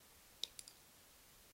lapiz cae fuerte
cae, fuerte, lapiz